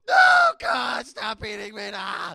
Zombie related screams
scared, zombies, screaming